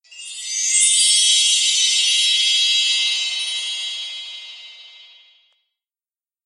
Fantasy SFX 006
high sparkly sound